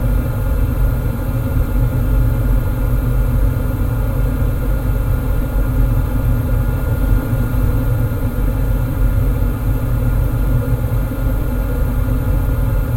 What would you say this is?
Oil burner ignition loop
Ignition loop of an oil burner used in central heating systems.
blower, burner, field-recording, fire, furnace, heating-system, ignition, industrial, loop, machine, machinery, motor, noise, oil-burner, start